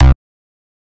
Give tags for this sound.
bass
lead
nord
synth

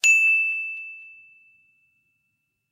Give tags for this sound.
ding
bell
sample

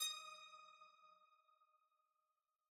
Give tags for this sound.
confirmation
glass
ux

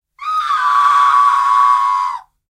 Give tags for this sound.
666moviescreams
agony
crazy
horror
pain
scream
screaming
yell

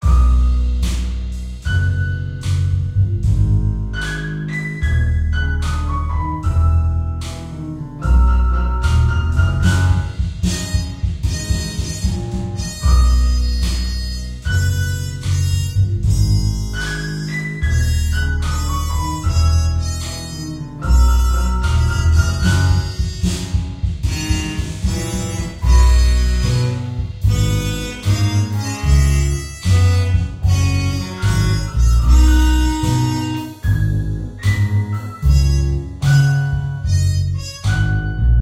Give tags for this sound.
music
Jazz
game
jazzy
videogamemusic

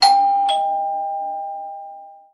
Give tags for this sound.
g
dong
octave
doorbell
chime
bell
ring
door-bell
bing
bong
ding
ping
tuned
Gsharp
house
ding-dong
door

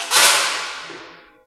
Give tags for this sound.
hospital
percussion

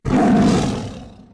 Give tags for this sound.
growl
monster

